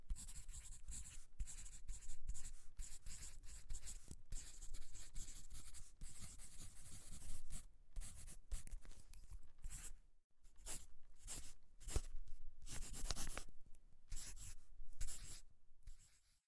Writing on paper with a pencil and drawing some lines.
writing, write, pencil, scribbling, pen, paper